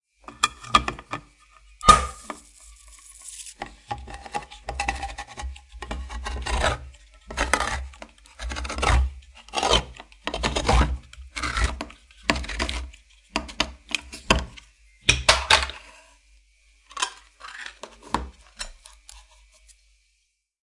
The sound of a can opened in my kitchen